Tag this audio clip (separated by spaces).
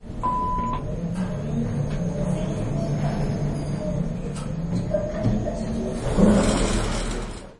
elevator move building lift